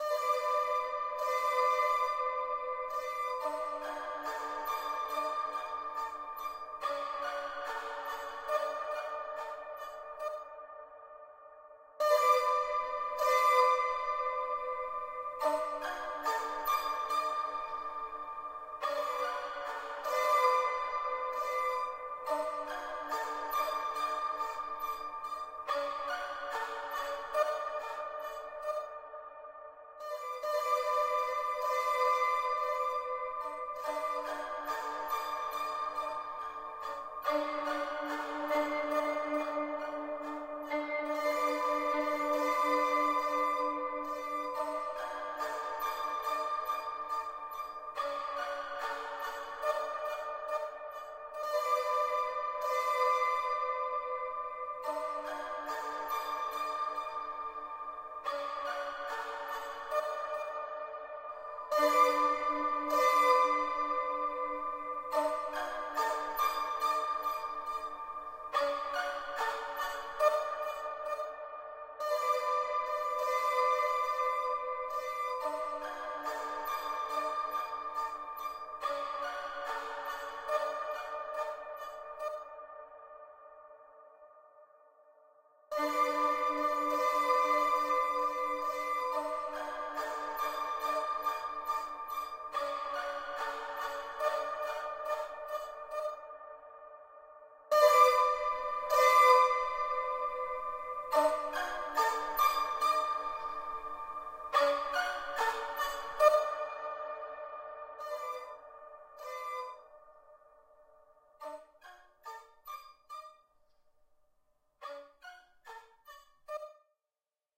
violin muckabout
Recorded myself mucking about on the violin and got this little riff. Put some reverb on it but that's about all. The Riff Begins with a db duo note.